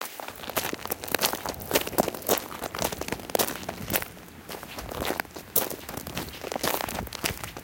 Walking on icy gravel.
Crunchy, Field-Recording, Footsteps, Walking